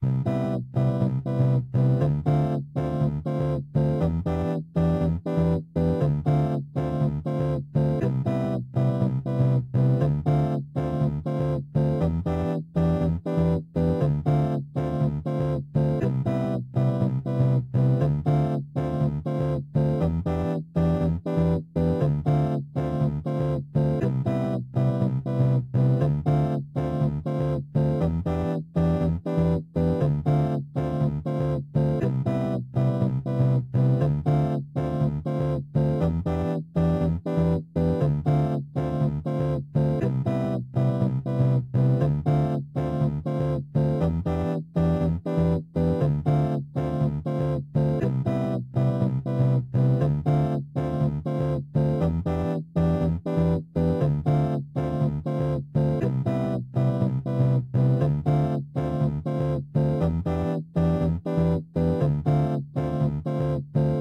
8 bit game loop 003 simple mix 4 long 120 bpm
beat, music, loops, electro, gameloop, gameboy, loop, nintendo, 8bit, drum, 120, electronic, josepres, 8-bits